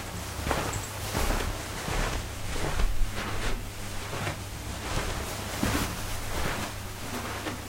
walking on carpet
carpet, walking